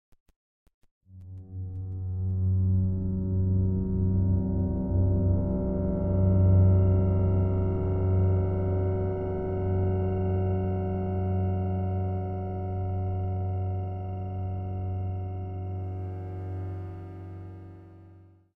Somewhat creepy bass drone made with vst synths. No other processing added.